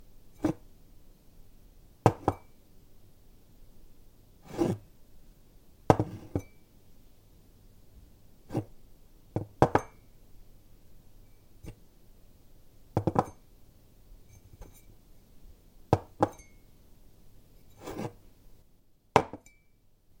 Coffee cup table
Lifting a coffee cup from the table and setting it back down again. Recorded using a Zoom H6 with shotgun capsule.